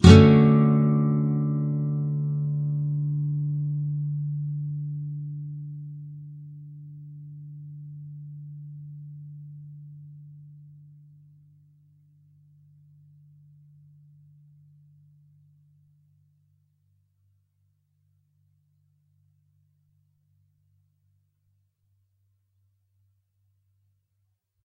D7th up
Standard open D Major 7th chord. The same as D Major except the B (2nd) string which has the 2nd fret held. Up strum. If any of these samples have any errors or faults, please tell me.